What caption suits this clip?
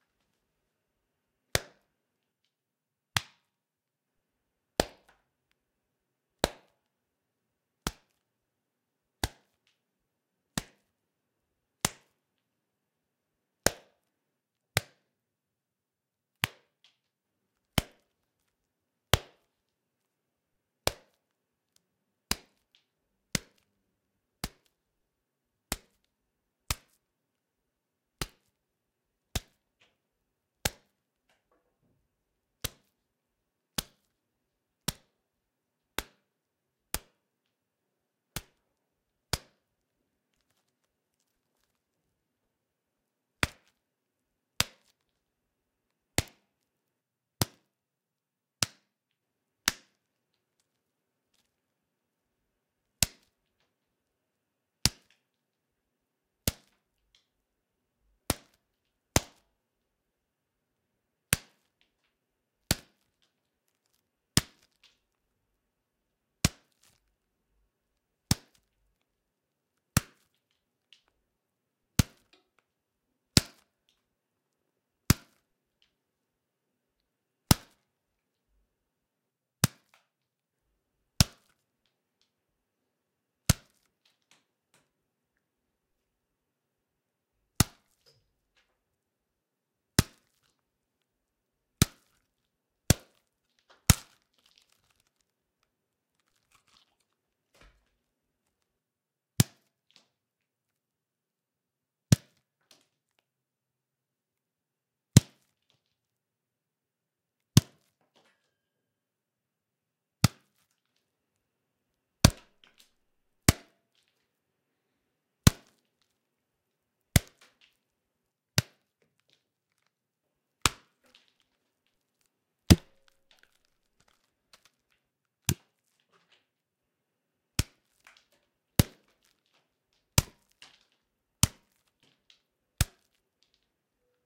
Punch Pack
A number of punches, recorded with zoom h5 using a cabbage